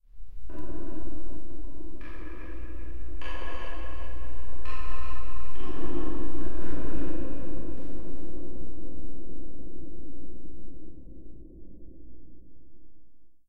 Bruit de percussion étiré parespace de copie.d'ailleurs, if anyone wants the original sample, I'll upload it, its of me walking around in my garage, and closing a metal trash can. Just tell me if you want me to upload it.
dub, perc, percussion, ragga, space